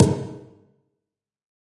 A snare for your enjoyment!